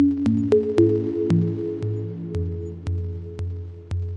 Catchy background loop (115 BPM)